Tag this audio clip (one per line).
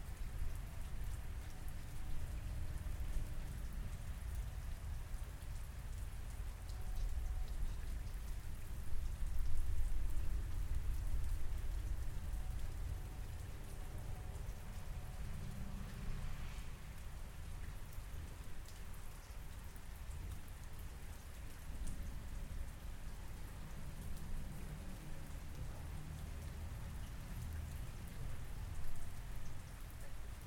rainstorm
storm
thunderstorm